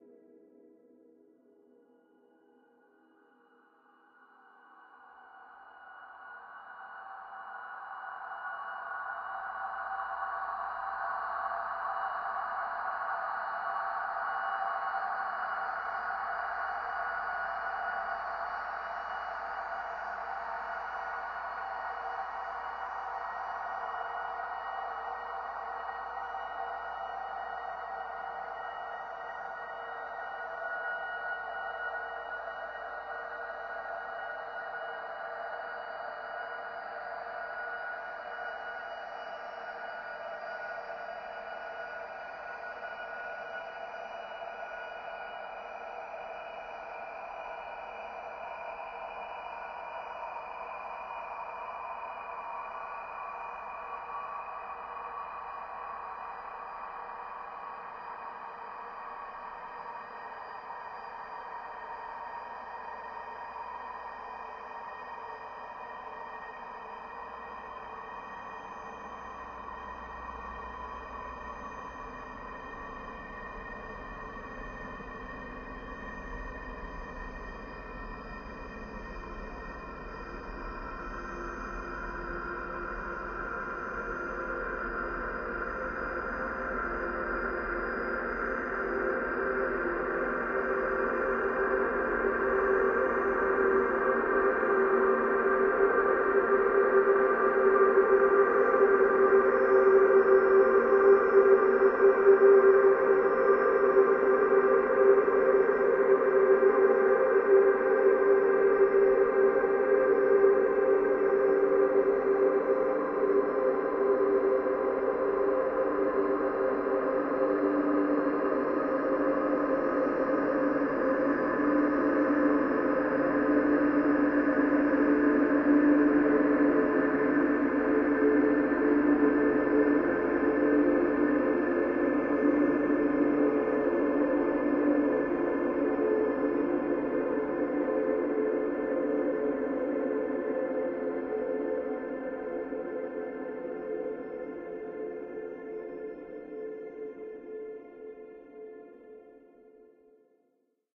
LAYERS 008 - MegaDrone PadScape - F6
LAYERS 008 - MegaDrone PadScape is an extensive multisample package containing 97 samples covering C0 till C8. The key name is included in the sample name. The sound of MegaDrone PadScape is already in the name: a long (over 2 minutes!) slowly evolving ambient drone pad that can be played as a PAD sound in your favourite sampler. It was created using NI Kontakt 3 within Cubase and a lot of convolution (Voxengo's Pristine Space is my favourite) as well as some reverb from u-he: Uhbik-A.
DEDICATED to XAVIER SERRA! HAPPY BIRTHDAY!
ambient, artificial, drone, evolving, multisample, pad, soundscape